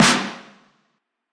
a snare sample I made based off a DM5 and a 707 snare sample as a base alongside lots of processing!
drum, snare, synthwave